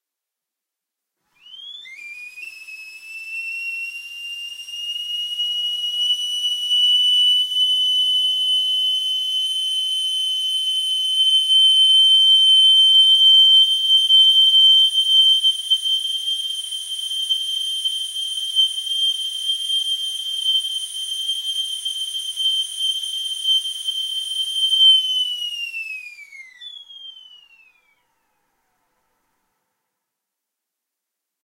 Kettle - Whistling - Close
A kettle whistling when water is hot.
Interior recording - Close - Mono.
Recorded in 2003.